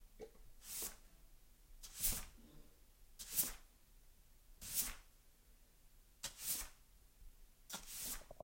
Sweeping the floor
Sweeping my kitchen floor with a broom